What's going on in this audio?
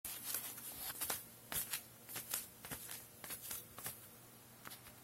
The steps sound of a person walking normally on the flat floor with a sandal.
floor, footsteps, sandals, steps, slow, walk, field-recording, person, walking